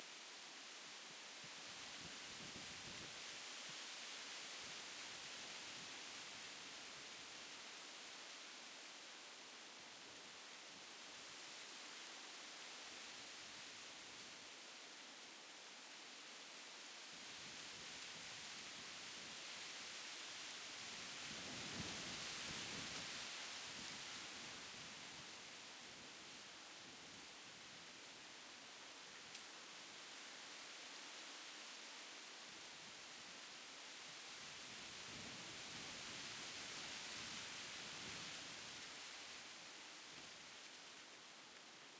wind leaf
leaf, tree, wind